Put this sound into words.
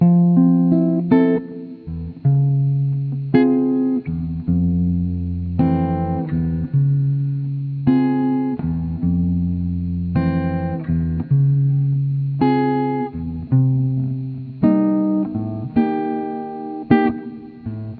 Fmaj7 Jazzy
Jazzy loop apr. 55 bpm.
The chords go Fmaj7 C#maj7 Cminor7 d#
Enjoy !
fusion, acoustic, jazz, groovie, fraendi, weiry, jazzy, lalli, slow, guitar, strange, iceland, loopable, loop, larus